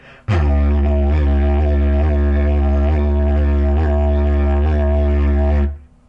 Didg Song 2
Made with a Didgeridoo
australian, aboriginal, didgeridoo, indigenous, woodwind